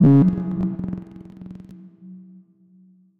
Half-gated glitchy saw wave with long, deep reverb. Maybe an alien horn.
alien, filter, glitch, resonance, saw, sci-fi